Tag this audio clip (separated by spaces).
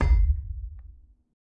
impact frag gong metal hit battlefield kill metallic